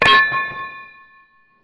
a selection of dropped items sounds recorded on a Zoom H4n recorder and edited in Adobe Audition
Please use and enjoy these sounds.
Don't be a douchebag and repackage or sell them as your own, karma will bite you in the dogma!